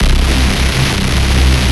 This is used by Sylenth with low noise reduction volume and an Bit reduction FX
Ambient, Binaural, Combfilter, Drone, Multisample, Ringmod, SFX, SciFi, Sounds, Space, Strings, Synth, Texture, abstract, design, futuristic, marbles, resonant, science, sound, strange, underworld, universe